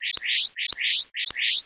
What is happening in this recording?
The short wave I used to produce Robotic chirping from ...